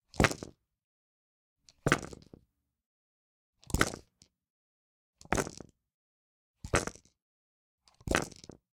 Dice (6) slam on felt
The name describes what it is: eg. Dice (3) in cup on table = Three dice are put in a cup which stands on a table.
The sounds were all recorded by me and were to be used in a video game, but I don't think they were ever used, so here they are. Take them! Use them!
foley, yatzy, dice, game, die